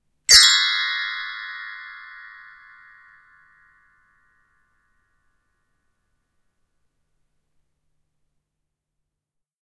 Hand Bells, Cluster
All of the sounds in my "Hand Bells, Singles" pack clumped together to make this cluster chord. It is 13 notes in total; the entire chromatic scale from Low-C to High-C.
An example of how you might credit is by putting this in the description/credits:
And for more awesome sounds, do please check out my sound libraries or SFX store.
The sound was recorded using a "H1 Zoom V2 recorder".
Edited using Audacity on 15th March 2016.